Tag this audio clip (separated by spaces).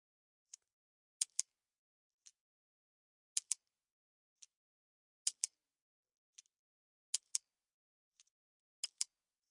obsessive-compulsive uam clicking 5naudio17 disorder pen